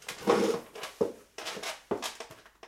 asseoir chaise4
sitting down on a wood chair which squeak